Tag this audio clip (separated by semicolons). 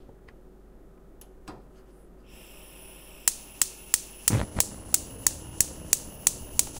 cooking; kitchen; domestic-sounds